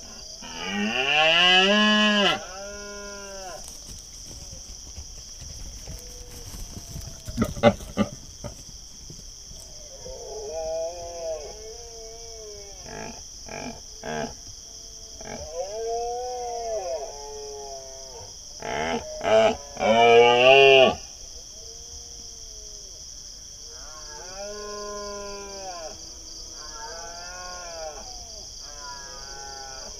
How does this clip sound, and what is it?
close take of male Red-deer running, roaring and grunting.